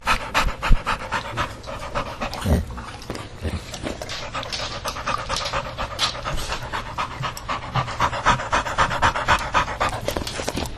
This is my Old Victorian Bulldogge Ruby panting after playing outside. She loves to run and gets winded! We would love to know how you use the sound. It was recorded with an Olympus Digital Voice Recorder VN-6200PC